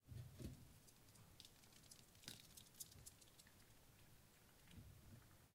08-01 Degu Running on floating floor
Degu_Running on floating floor